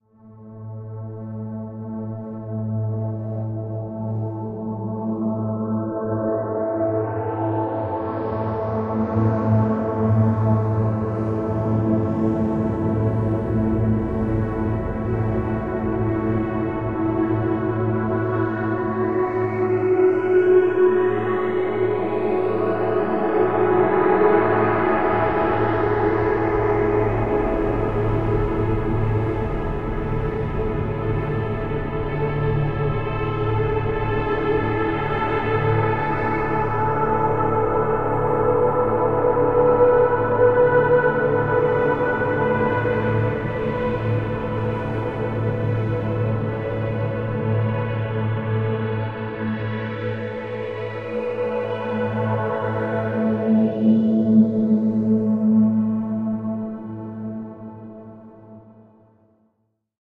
Soundscape produced from one looped sample in Metasynth.
atmosphere, metasynth, atmos, ambient, pad, soundscape, drone